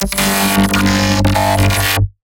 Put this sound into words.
Glitchy Robot Scream - 21
Glitchy robot scream, made for a game with robot enemies.
I made it by using Audacity's "Import Raw Data" function to import random program files which creates all kinds of crazy noises. (All the sounds in this pack came from the files of an emulated PS2 game.) I then put a Vocoder on the sounds I found to make them sound even more robotic.
Computer, Cursed, Glitch, Glitchy, Mechanical, Noise, Robot, Scream, Technology